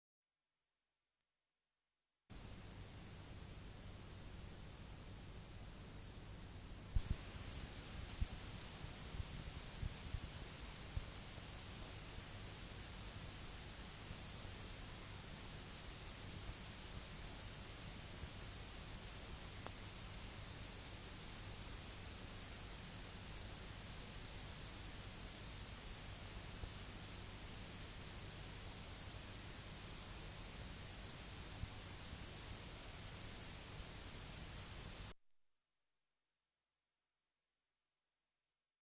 Recording tape hiss from a blank tape with Denon DN-720R tape recorder and player with Focusrite Scarlett 2i4.